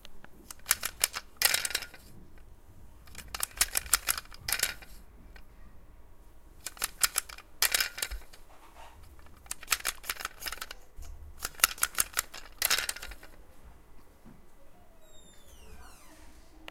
mySound Sint-Laurens Belgium Wieltjes
Sounds from objects that are beloved to the participant pupils at the Sint-Laurens school, Sint-Kruis-Winkel, Belgium. The source of the sounds has to be guessed.
Wieltjes, Sint-Kruis-Winkel, mySound, Belgium